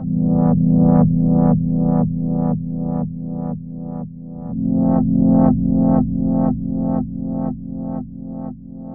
Two different chords being played through an echo. 107.5 BPM
synth, chord, echo, pad